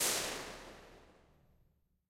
Railway station waiting room
IR recorded a long time ago in the waiting room at Hayes and Harlington railway station. Another one I'll never use but someone might.
HAY waiting room